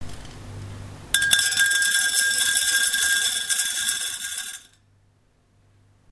Coffee bean pour onto pan 1 (1)
Beans falling from 30cm height into metal containers
kitchen, bean, coffee, rain, patter, pan, falling, pea, shop, machine